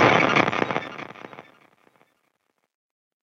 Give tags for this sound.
crunchy digital glitch lo-fi special-effect

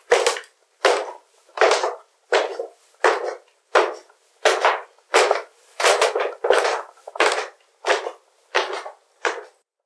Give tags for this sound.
floor footsteps stairs steps walking wood

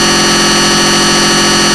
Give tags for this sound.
CMOS Noisemaker production

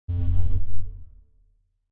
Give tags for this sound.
computer splash alarm beep sound-design freaky abstract typing weird push button digital resonance

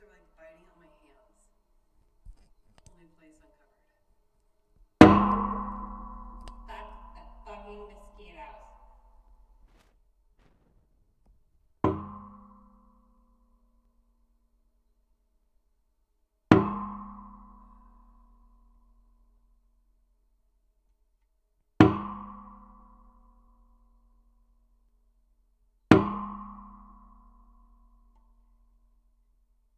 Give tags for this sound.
bang clang contact-mic metal metallic piezo

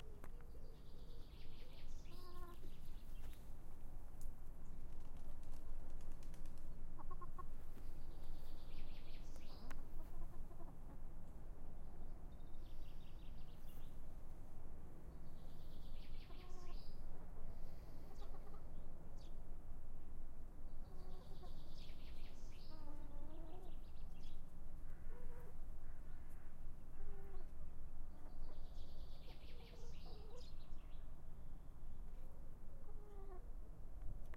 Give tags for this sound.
domestic-sounds recording

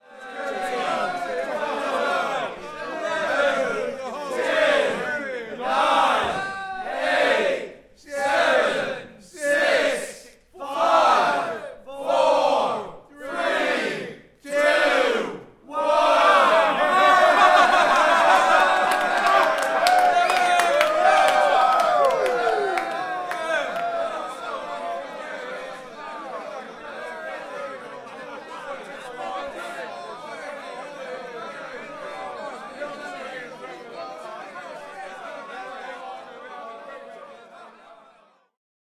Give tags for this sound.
human voice

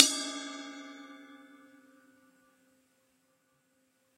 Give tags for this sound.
1-shot cymbal multisample velocity

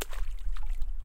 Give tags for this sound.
nature smack water

